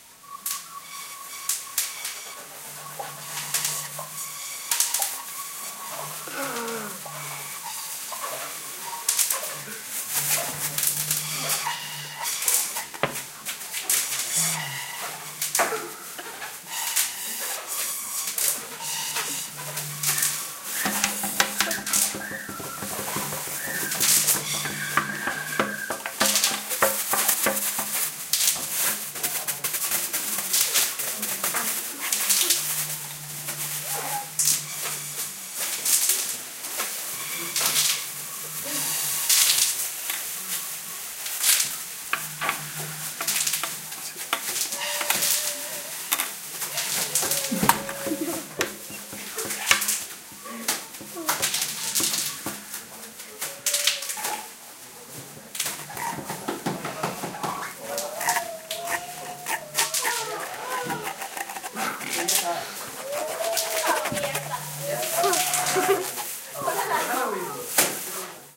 This sound has been produced with different objects like tubes, bottles and plastics to imitate the sound of the wind that we have in our region: the Tramuntana wind. We are inspired by the picture "Noia a la Finestra" by Salvador Dalí, so we produce also the sound of the sea, the crunch of the boats and the windows, etc. This is the recording from one specific corner of the class. There are some more, so we can have as a result of this pack, a multi-focal recording of this imaginary soundscape. We recorded it in the context of a workshop in the Institut of Vilafant, with the group of 3rEso C.
3rESO-C, Institut-Vilafant, crunch, sea, seagulls, tramuntana, wind, workshop